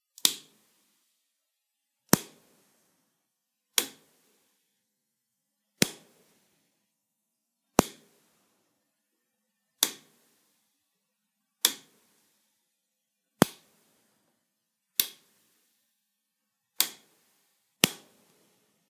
Might be useful for switches, buttons and situations where a clicking sound is needed.
switch, click, flip, off, turn, switches, switching, lamp, light, agaxly, desk